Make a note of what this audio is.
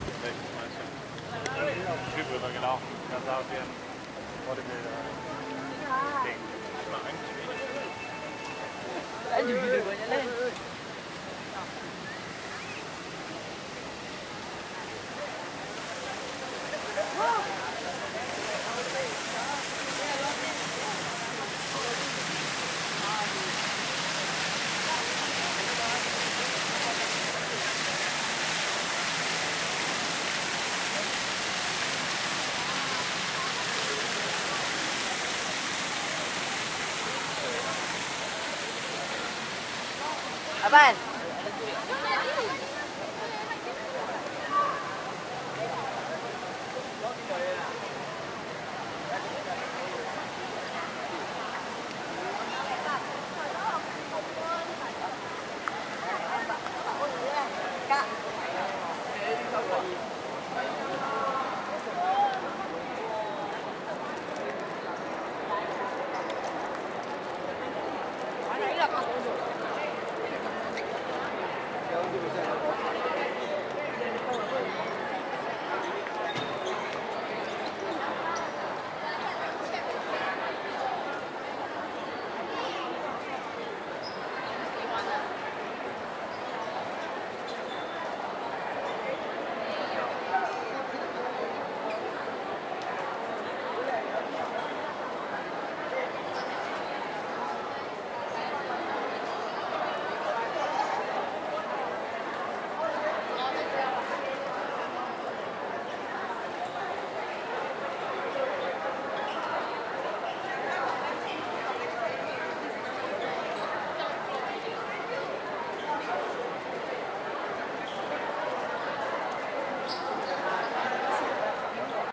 Walking from rain to consumption
Kuala Lumpur fieldrecording
Rain, Malaysia